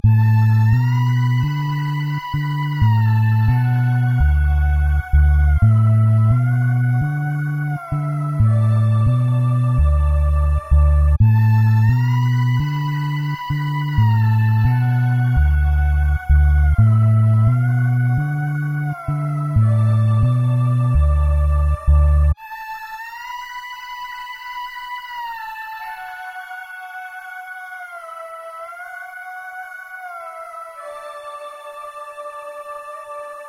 Spacey Lofi loop 86 BPM
86, ambient, beats, bpm, chill, lo-fi, lofi, loop, loops, melody, music, pack, sample, samples, spacey